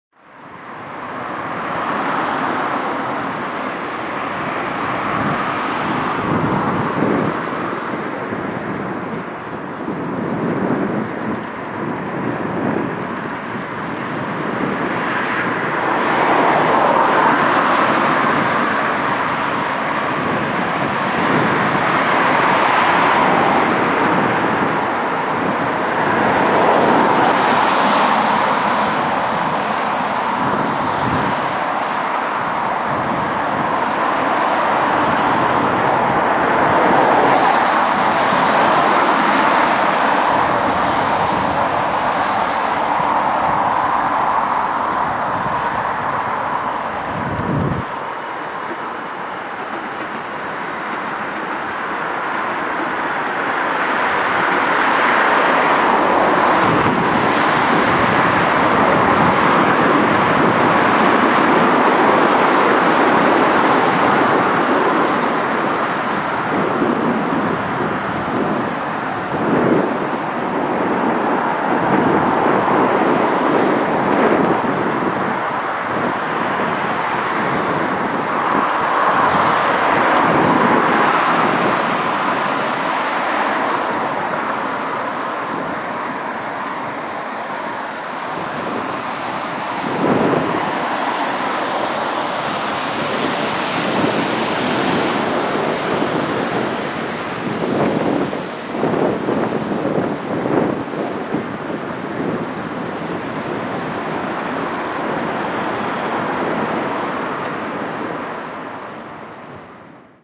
Cars passing by on a road while I'm cycling. Made with CanonLegria camcorder.
CanonLegria, cars, city, noise, road, traffic, transport, tyres